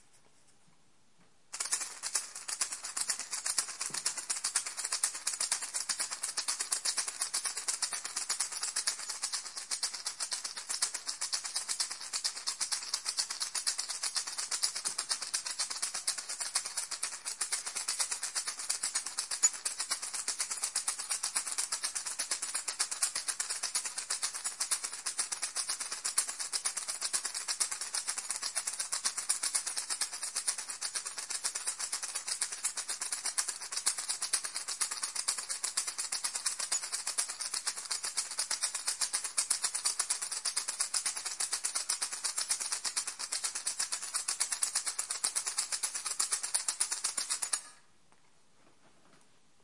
Small Gourd Shakers
Wouldn't mind a comment or an email with a link to your work, thanks!
Percussion, Shaker, Homemade